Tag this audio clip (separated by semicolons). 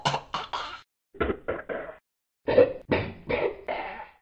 creature; choking-creature; choke; choking; creature-choke; monster-choking; monster